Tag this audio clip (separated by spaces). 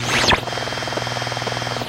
sweep shortwave